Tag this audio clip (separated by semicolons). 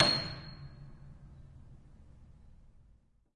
piano-bench; creaks; piano; pedal-press; bench; ambience; keys; hammer; noise; background; stereo; pedal